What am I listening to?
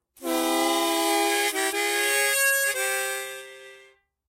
Chromatic Harmonica 30
A chromatic harmonica recorded in mono with my AKG C214 on my stairs.
chromatic, harmonica